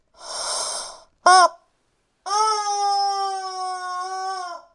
A toy rubber chicken